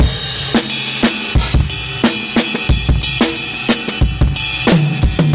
A short drum loop with a distinctive "low-fi" and "raw" feel. Could work well in a drum and bass or hip-hop project. Recorded live with a zoom H2N (line input from a soundboard).
percussion-loop, groove, hip-hop, drum-and-bass, samples, drums, loop, drum-loop, beat, breakbeat